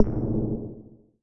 011-electronic airgun
Computer or Mobile Chat Message Notification
bounce-back, dark, knock, reverb, room, short, tap